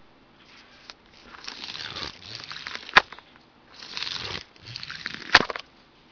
Pulling on a lanyard attached to a keychain.